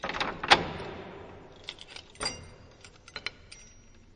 Clattering Keys 03 processed 01
rattling, shake, shaking, metal, motion, shaked, clattering, rattle